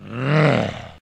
Zombie Roar 6
Recorded and edited for a zombie flash game.